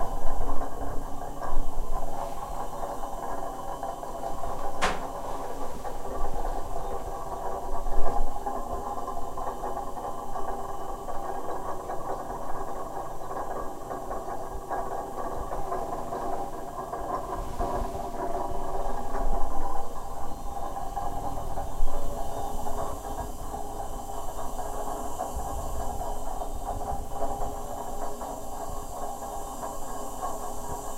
Recorded in a high concrete central heating room of a one hundred year old former school building in Amsterdam, near several pipes with pressure meters on them.